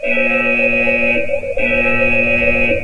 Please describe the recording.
Nuclear Alarm
Nuclear fatal alarm